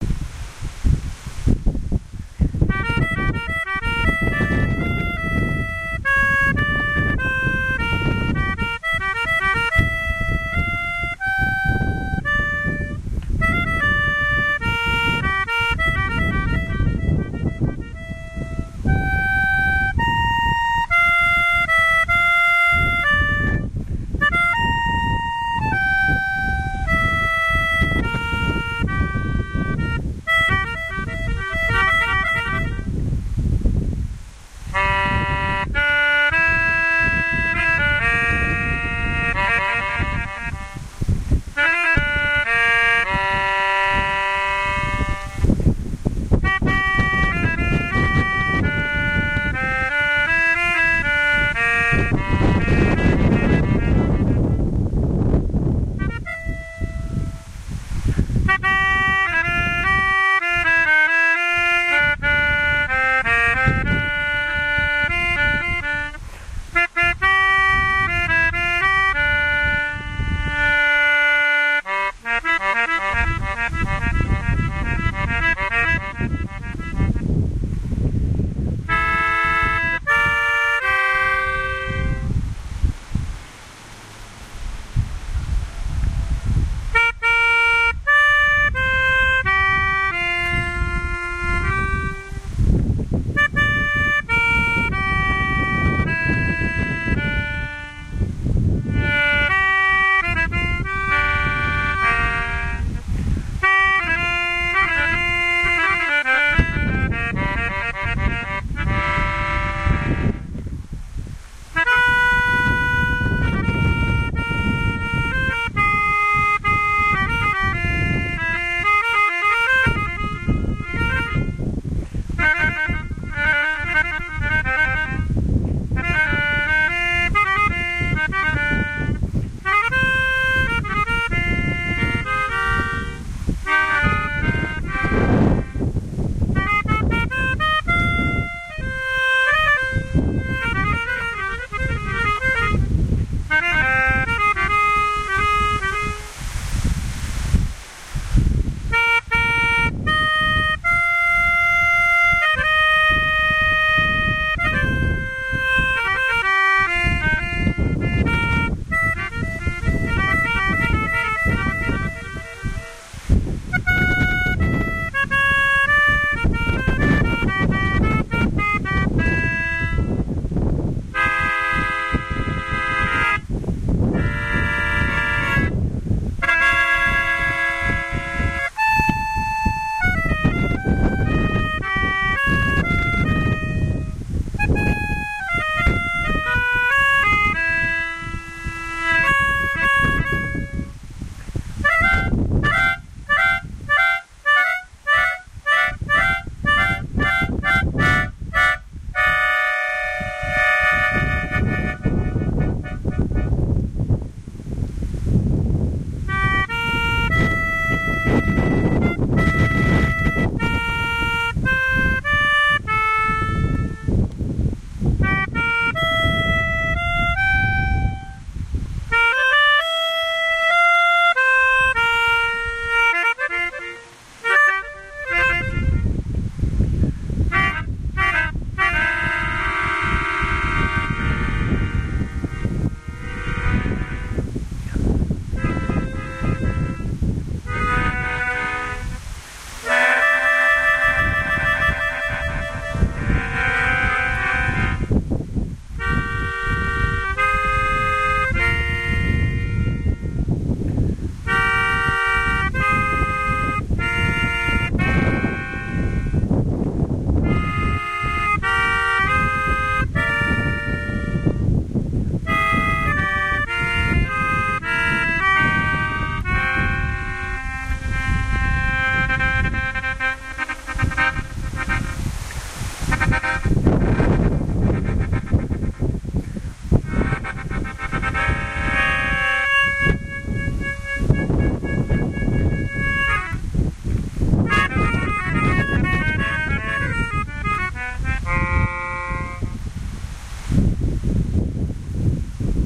melodica moment 3
instrument, melodica, melody